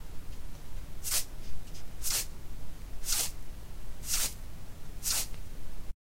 A salt shaker
Shaker, Salt